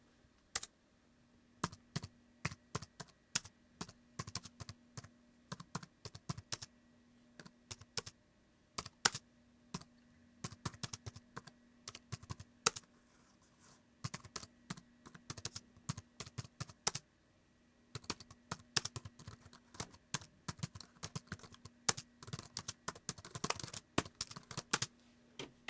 Typing on a laptop